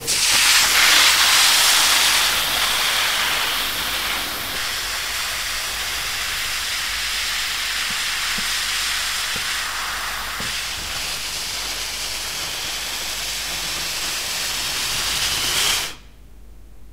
Letting Air Out Of Balloon
Death of balloon.
away, blow, blowing, flying-away, zoom-h2